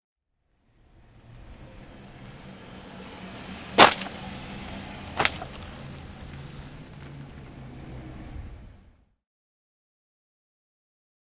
14 hn vanOvercup
Van runs over a paper cup and crushes it.
crush, cup, van